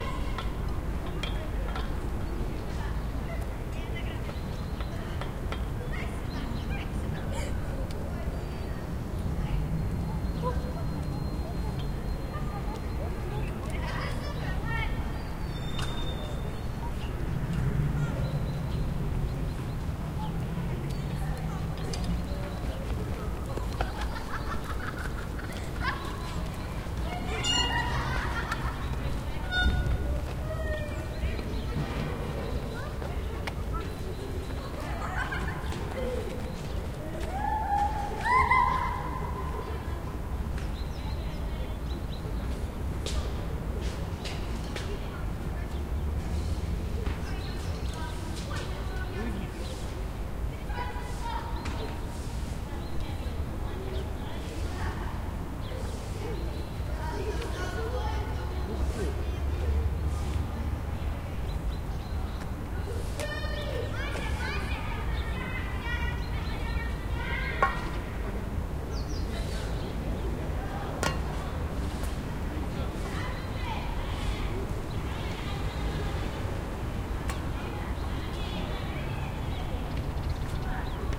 Atmosphere in the square yard between four buildings. Two of them 9 floors high and others 5 floors high. Sounds of children and trees on the wind and city noise and janitor sweeping leaves and creaking swings.
Recorded 2012-10-01 01:05 pm.